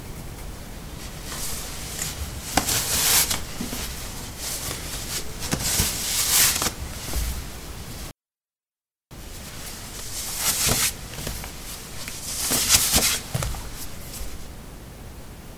Putting socks on/off on ceramic tiles. Recorded with a ZOOM H2 in a bathroom of a house, normalized with Audacity.